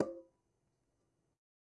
Metal Timbale closed 018
trash, closed, conga, real, record, home, god